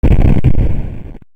Originally some brown noise through a short envelope and filter and a bit reduction module. Sort of a cross between a kick drum and an digital explosion.